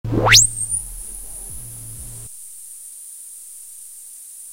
res out 09
In the pack increasing sequence number corresponds to increasing overall feedback gain.